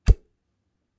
Bow Release (Bow and Arrow) 3
Sound of the releasing of a bow when firing an arrow. Originally recorded these for a University project, but thought they could be of some use to someone.
arrow, bow-and-arrow, cross-bow, crossbow, foley, hit, impact, shooting, shot, swish, swoosh, target, video-game, videogame, weapon, whoosh